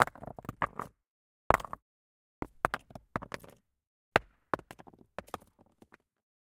Four recordings of rocks rolling down stone stairs. Can be slowed down to sound like larger boulders.
Recorded with a H4n Pro on 12/07/2019.